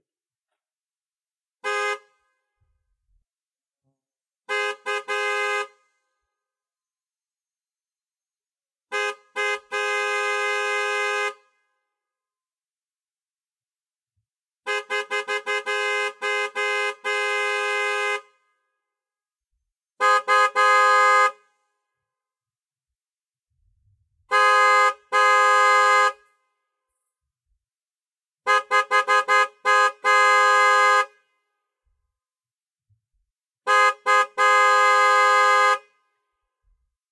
# CAR HORN - PANIC
The driver of this car is trying to alert a fellow driver on the highway that there is a killer hiding in her backseat. It is a 2012 Chevy Impala recorded with a ZOOM handheld H4N and nature noises removed w/noise reduction.
2012, chevy, honks, horn, impala